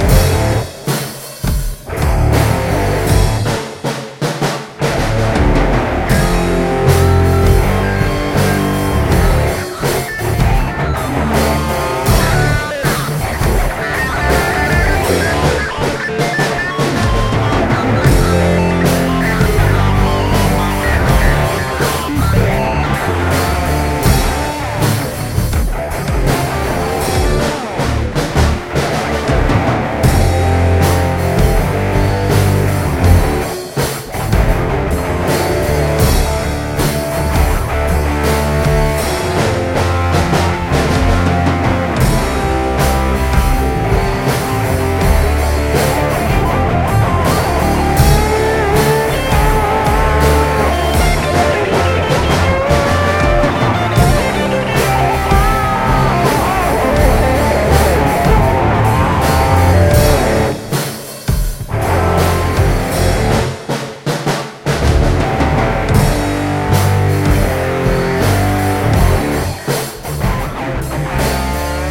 Jammin with Snapper
I got the drum track from Snapper4298
Had fun this morning jammin' with him.
hard, metal, Rock, jam, 4298, loop, snapper